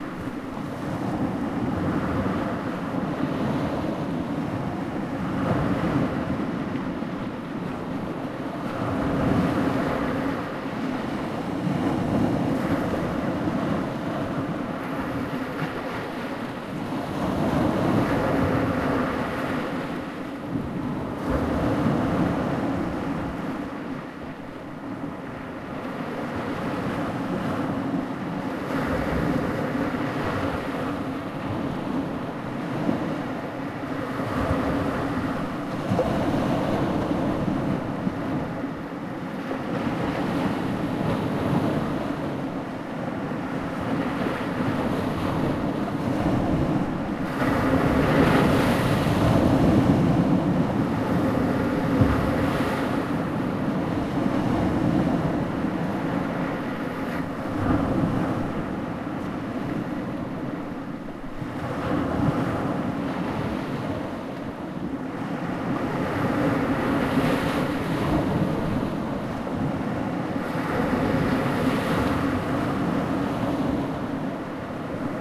strong waves
Sound of waves on the rocky seashore recorded on the island Vis, Croatia.
beach, coast, coastal, field-recording, noise, ocean, sea, seaside, shore, splash, splashing, surf, water, wave, waves